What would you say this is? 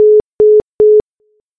busy signal europe
Busy signal on european (excepting UK) telephony. Made with Audacity.
europe, dtmf, telephony, dial, phone, tone, busy, telephone, eur, europa, communications, signal